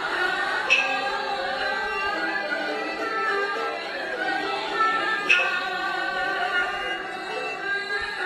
This sound belongs to a sample pack that contains all the sounds I used to make my Vietnam mix. (I'll post more info and a link on the forum.) These sounds were recorded during a trip through Vietnam from south to north in August 2006. All these sounds were recorded with a Sony MX20 voice recorder, so the initial quality was quite low. All sounds were processed afterwards. This is recorded in a Caodai temple outside of Ho Chi Minh City (Saigon).
religion, sound-painting, vietnam, asia, temple